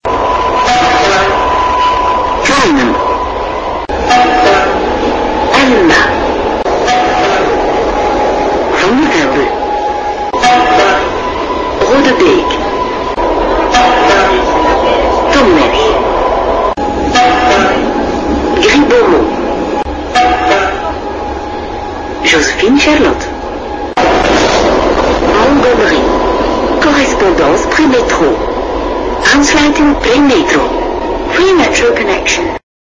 Brussels Subway
Brussels, metro, subway